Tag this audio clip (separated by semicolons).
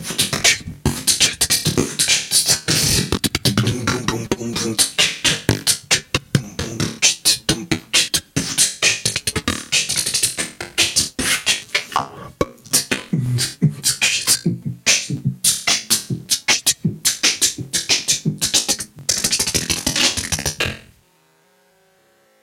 breath; breathing; dance; dark; distortion; effect; electro; electronic; game; gasping; gritty; hardcore; horror; industrial; male; noise; porn-core; processed; random; rave; resonance; sci-fi; sigh; sound; synthesizer; techno; unique; vocal